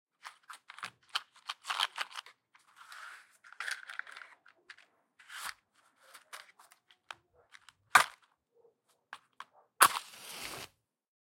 Lighting a Match 1 1
Cigar, Cigarette, Dinamyte, Fire, Heat, Light, Lighting, Match, Stick